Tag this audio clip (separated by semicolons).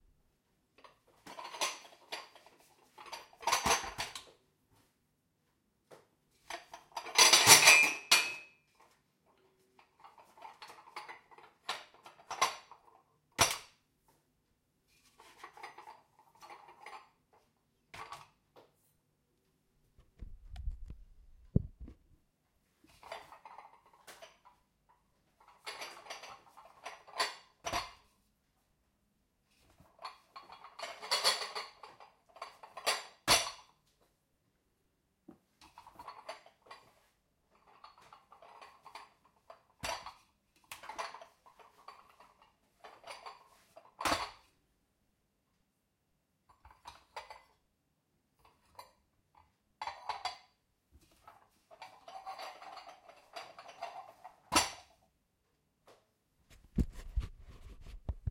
Field-recording; rattle; tea